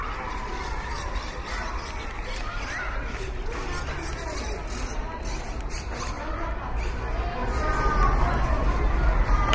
SonicSnap SASP AndreuNuria
Field recordings from Santa Anna school (Barcelona) and its surroundings, made by the students of 5th and 6th grade.